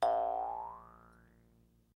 jaw harp12
Jaw harp sound
Recorded using an SM58, Tascam US-1641 and Logic Pro
jaw, twang, harp, funny, bounce, boing, doing, silly